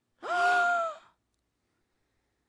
Two people gasping in astonishment. Recorded with SM58 to a Dell notebook with an audigy soundcard.